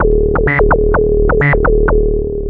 Robotic sounding Arp 2600 sequence